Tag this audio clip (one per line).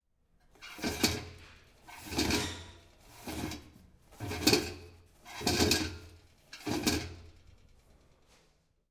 bathroom
campus-upf
toilet
toilet-paper
toilet-paper-roll
unrolling
UPF-CS13